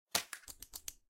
pickup pen
Picking up an pen for a game potentially